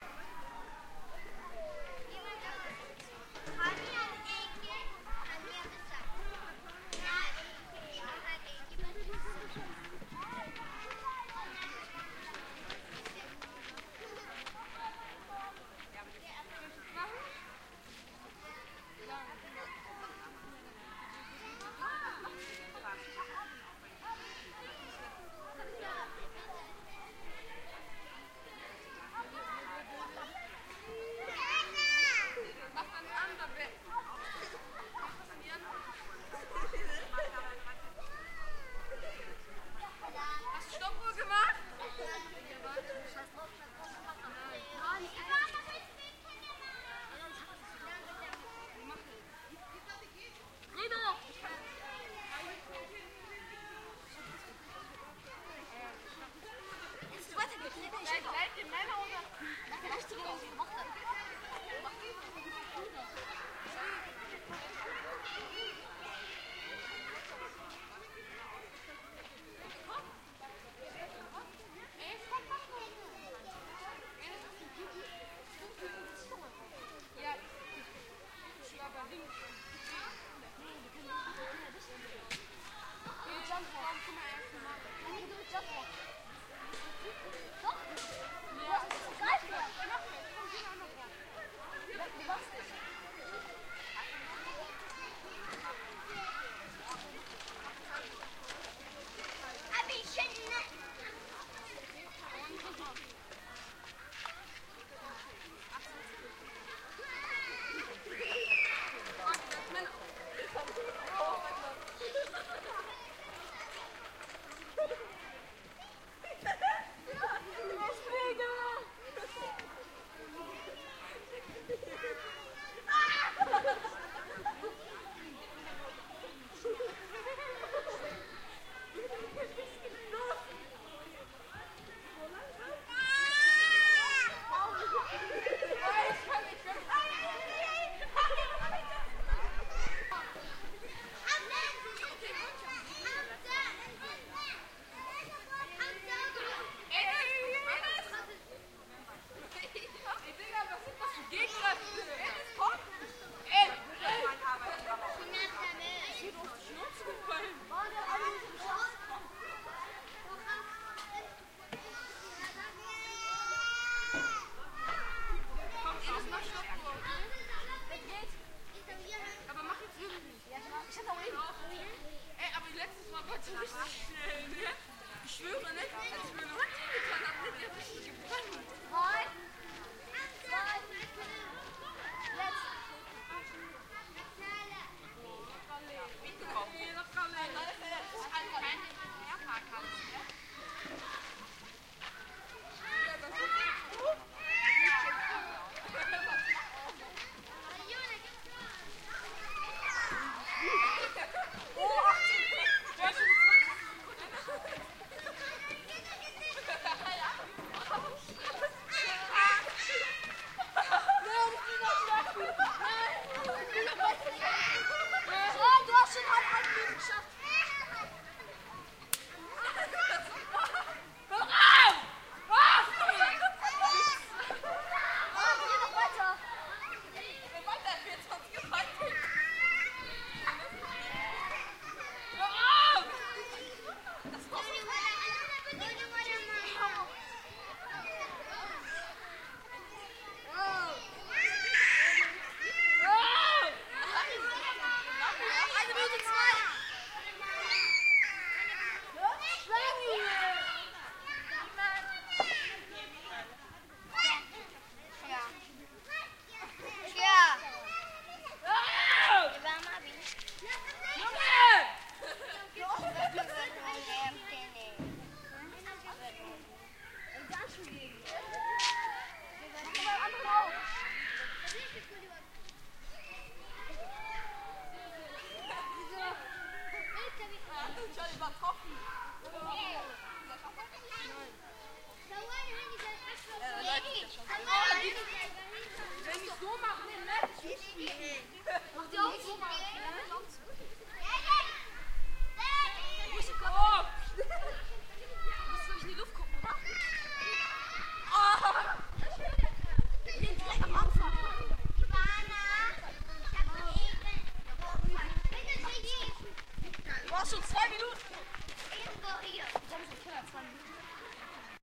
Children playing on a playground in a park (german and ohter languages)
Playground, children, german, outdoor, park, play, playing, playschool, sandbox, yard